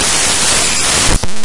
File made by importing text files into Audacity
raw, audacity, noise, data, text, file